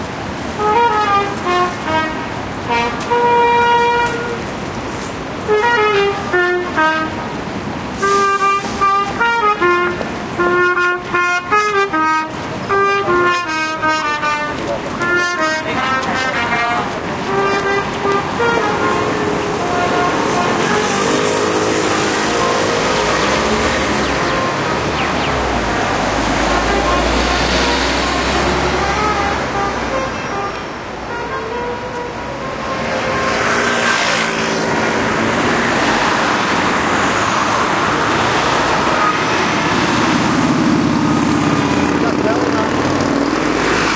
20100927.madrid.gran.via
street ambiance at the Gran Via of Madrid, a street musician plays a well-known piece at the trumpet, with an overhelming traffic noise in background. Olympus LS10, internal mics